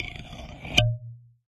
tweezersB scrapetoboing 3
Tweezers recorded with a contact microphone.
sfx, fx, tweezers, effect, metal, close, microphone, sound, contact, soundeffect